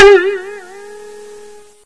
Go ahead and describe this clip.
Bending bending a sting on a tetragourd. Recorded as 22khz